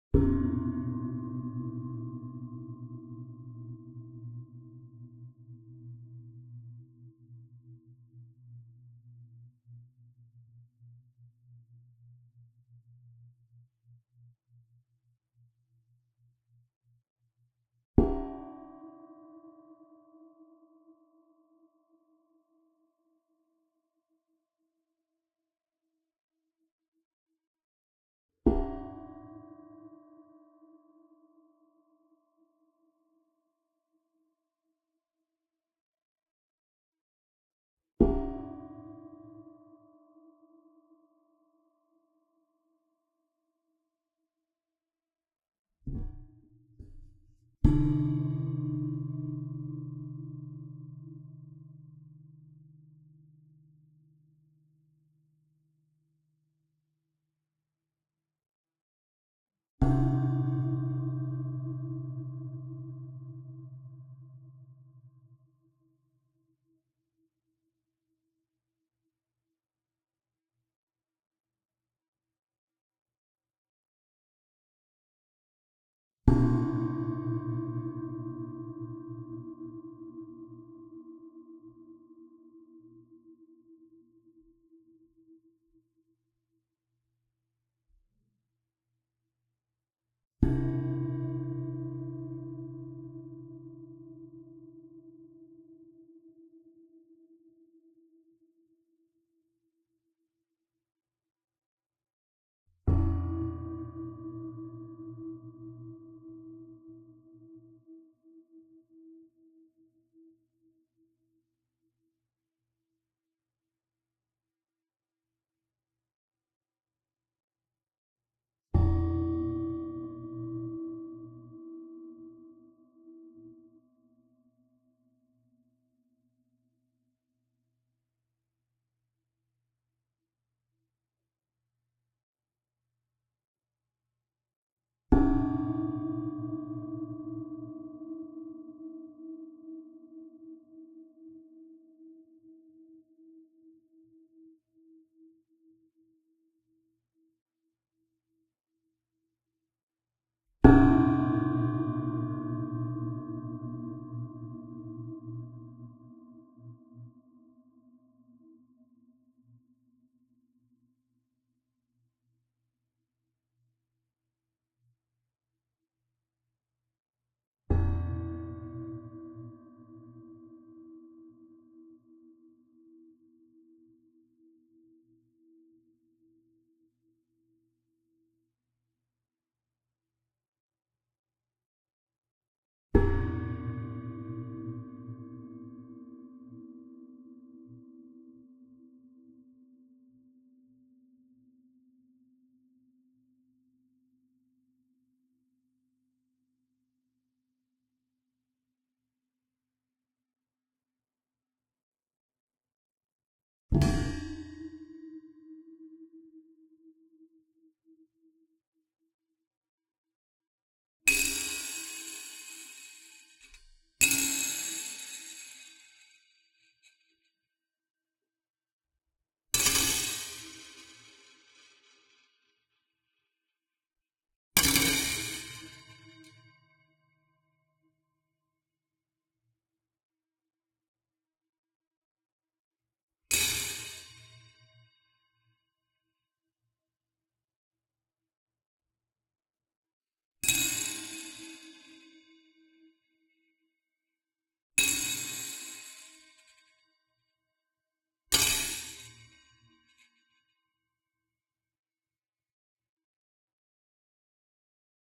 20180408 Oven rack
bell-like, clang, experiment, experimental-percussion, hit, kitchen-items, metal, metallic, onesounperday2018, oven, steel, ting